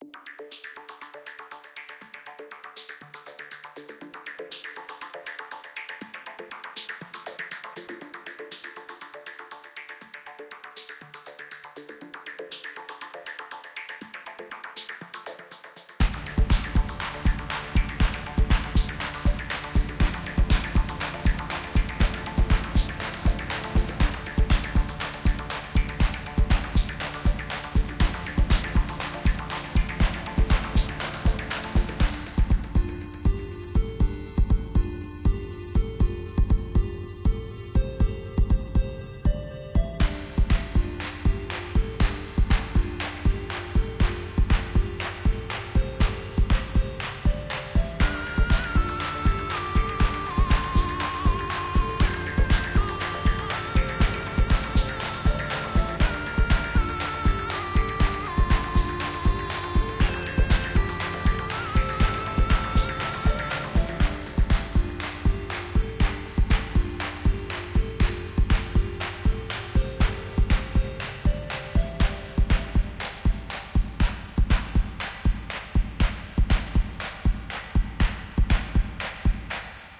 Wild Things
A 1 minute build centered around some simple progressions with some building clean analog synth. Perhaps could be background in a film?